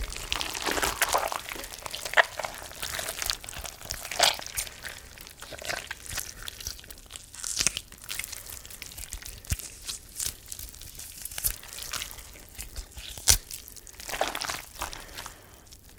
Pumpkin Guts Squish